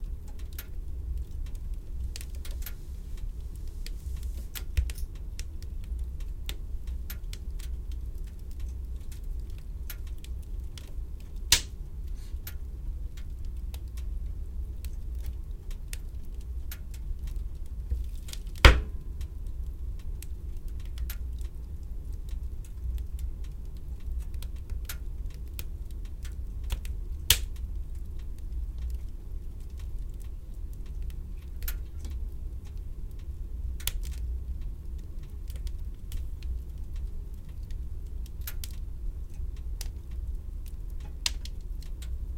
almost clean sound / dry
recorder As I remember on light semi profi microphone, little post production
recorded fire in hearth